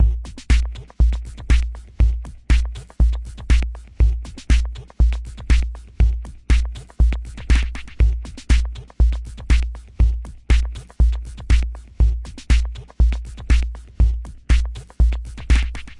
aisha 01 28 05 Drums
A beat I made using Reason.
drums, percussion, reason, beat, aisha, synthesized